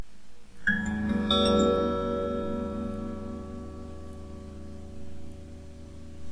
Recorded through audacity on linux. Hitting the strings above the neck.
experiment, guitar